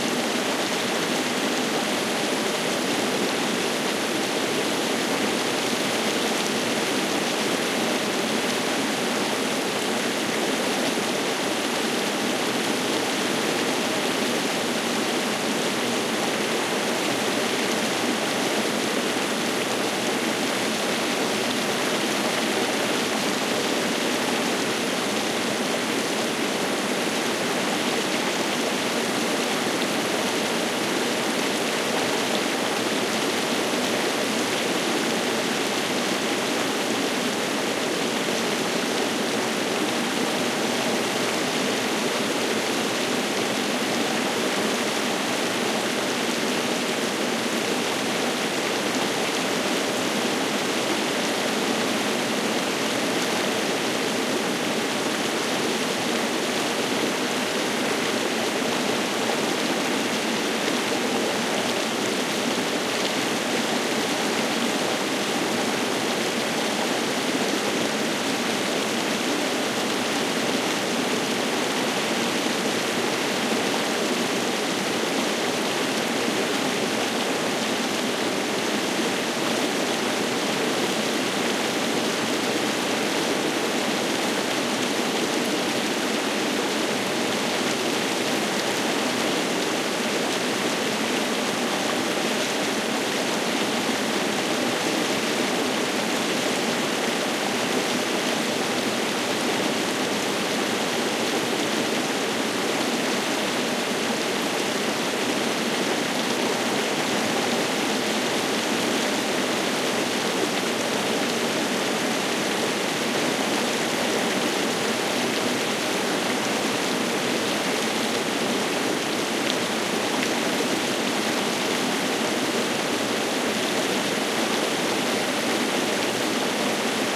20110804 river.close.18
close take of a stream. Recorded near Brieva de Cameros (Logrono, Spain). Shure WL183, Fel Preamp, PCM M10 recorder